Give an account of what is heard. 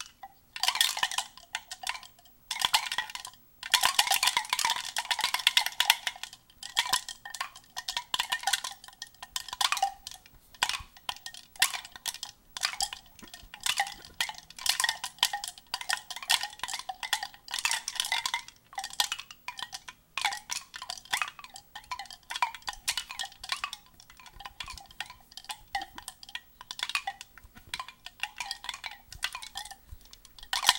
You guessed it, ice cubes in a plastic cup! Recorded with usb mic to laptop!
cup, plastic, ice, foley, cube